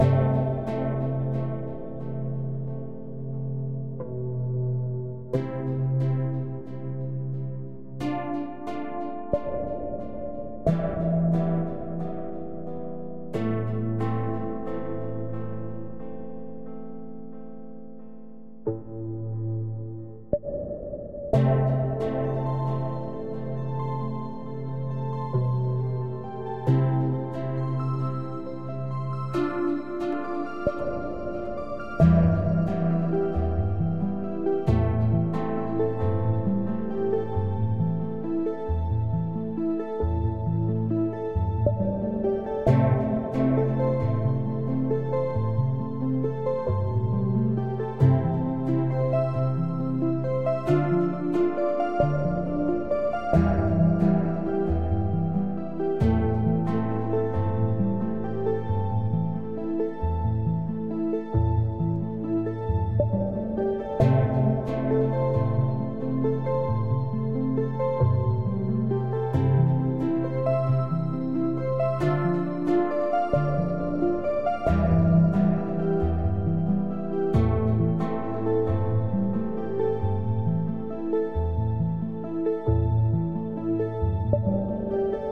Ambient electronic loop 001
Syths:Ableton live,Massive,Kontakt.